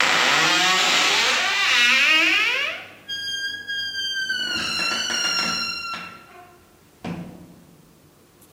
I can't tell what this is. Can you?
A loud squeaky door shutting
Door-shutting, Door-squeak